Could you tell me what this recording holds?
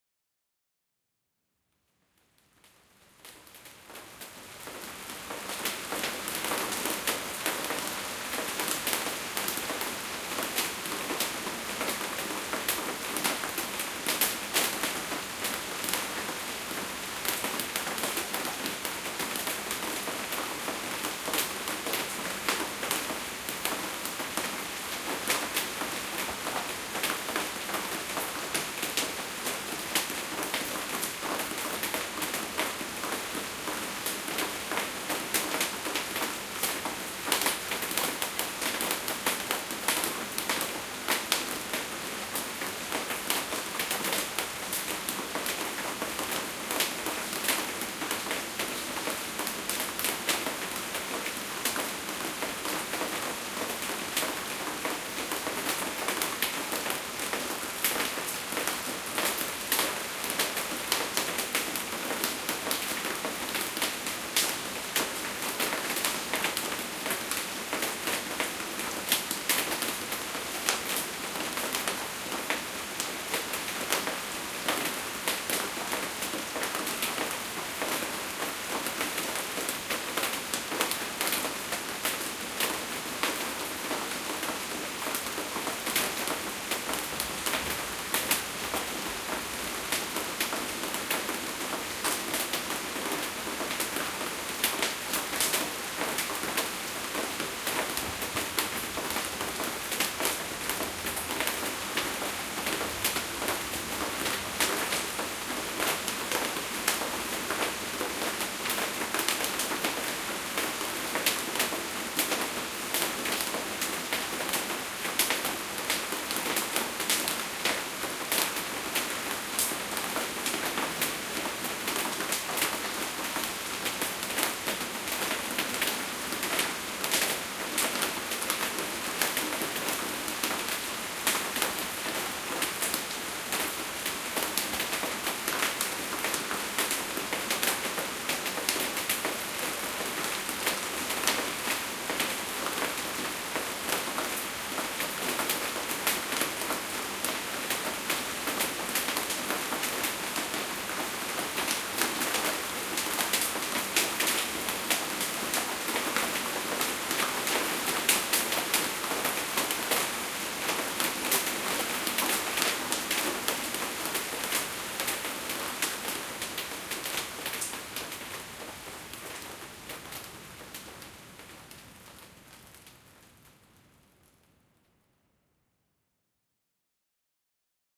Kolkata, India, medium rain falling on Fiber Roof at midnight.
Recorded with Zoom H4N.